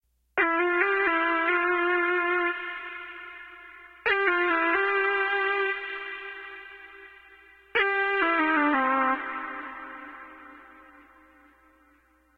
Arab flute
Arab, medium-release, no-vibrato, flute